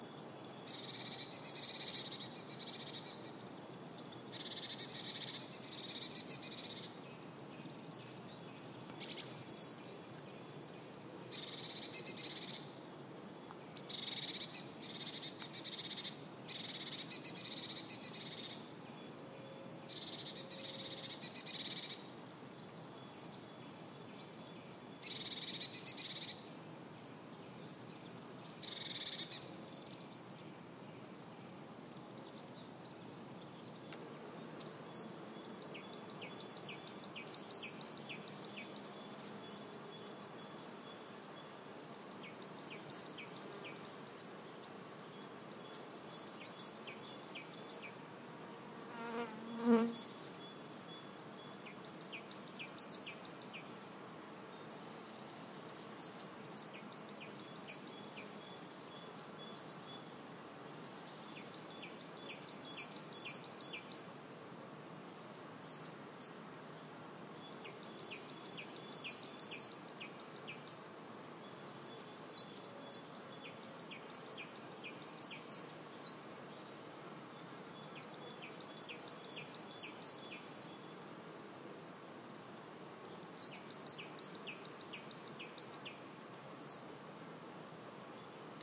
20070228.ambiance.forest
ambiance in pine forest near Hinojos, S Spain